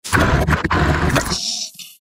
A small mechanical collection of suction, wheezing and grunts to simulate a creature that requires a breathing apparatus
monster, creature, growl, beast, creepy, horror, processed, creatures